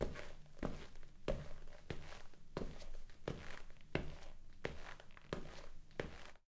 I decided to upload all of my sound design stuff where I am working or have worked on.
This is a series of soft rubber sole footsteps on a stone tile floor I recorded for slicing it up to load it in a sampler.
Recorded with a Brauner Phantom Classic via an XLogic Alpha VDH pre-amp from Solid State Logic.